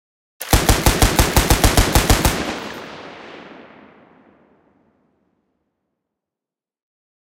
Made in ableton live. Version 3 Light machine gun sound with environment reverb. Processed.
arms, army, attack, bullet, explosive, fire, firing, gun, light, m16, machine, military, projectile, rifle, shoot, shooting, shot, soldier, war, warfare, weapon